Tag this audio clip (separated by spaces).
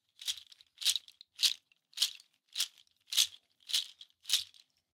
viento Cascos mar